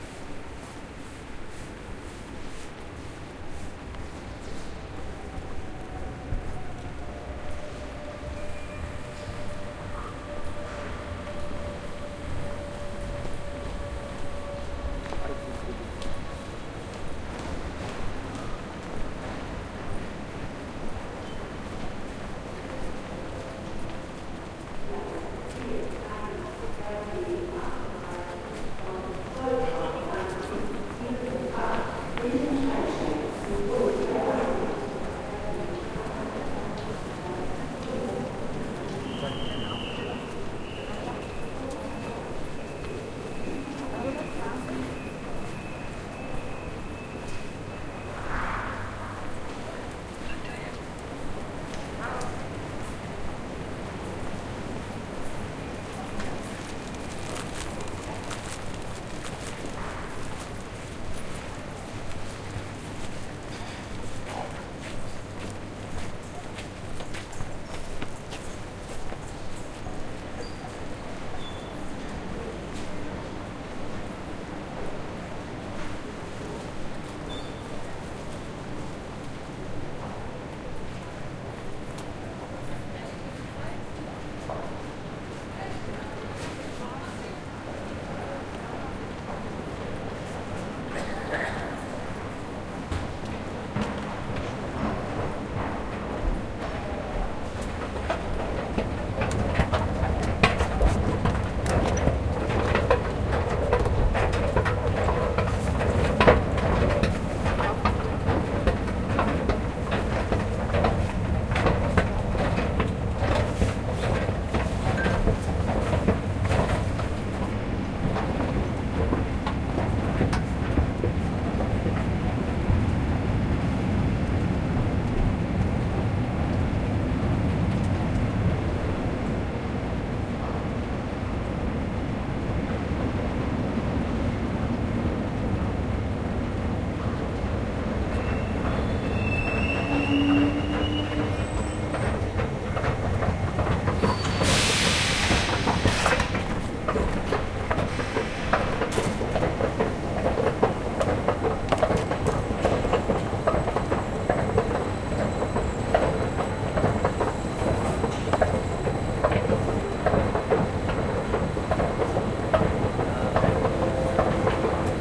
2022 trainstation atmopheric+rolltreppe 001
field recording at a train station with escalator sounds
ambiance, ambient, escalator, field-recording, passenger-train, rail, railroad, railway, train, train-station